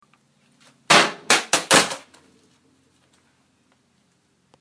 Laptop Drop 4
This is the sound of a laptop dropping on the ground.
laptop-break, laptop-drop, laptop-smash